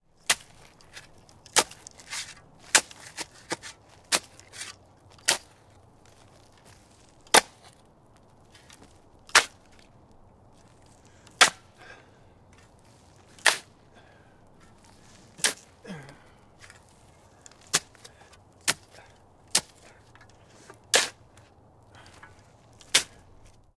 Digging with a shovel in dirt. Heavy breathing. Sennheiser MKH-416 microphone in Rycote zeppelin, Sound Devices 442 mixer, Edirol R4-Pro recorder.